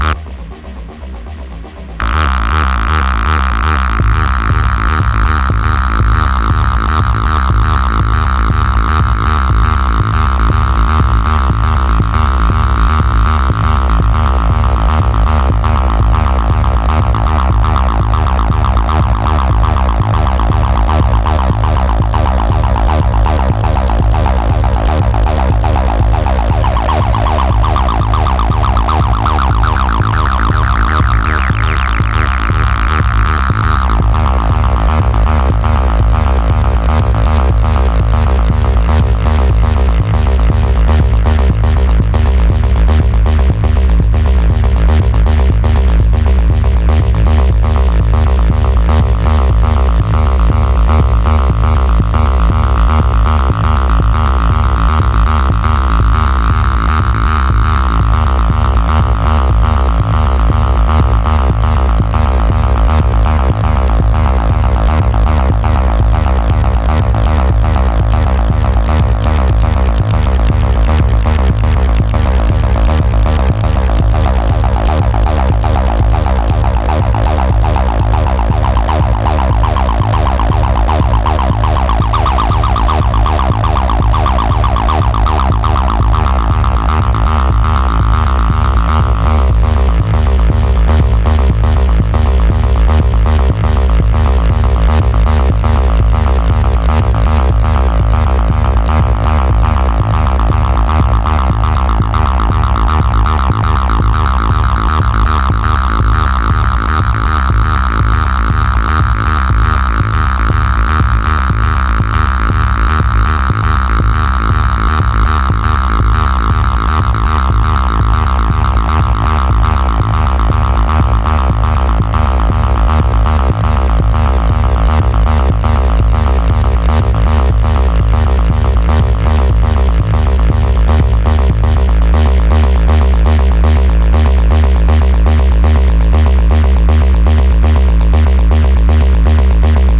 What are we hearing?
calculus acid n kick 120bpm
more 120bpm beats and stuff i made from scratch in Live using midi instruments and the non sample based softsynth phoscyon to build up sounds from waves, then run them through compressors, distorters, destroyers, eqs, and textures.